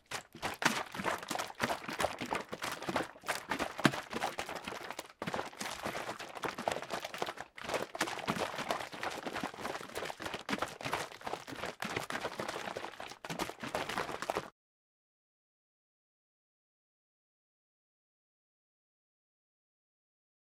Splashing bleach in container